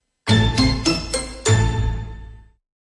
Short win result simple sound